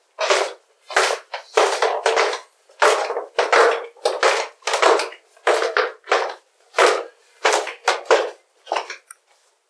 Foley Walking down stairs
Walking down wooden stairs in flipflops.